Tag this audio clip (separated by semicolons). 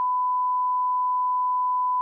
1kh; sound